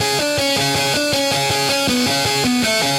80 Fowler Gut 04
synth, crushed, guitar, gritar, bit, blazin, distort, variety